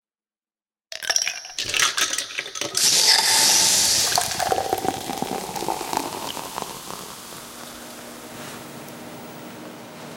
hielos gas servir cocacola - serve cocacola soft drink fizz ice
hielos gas servir cocacola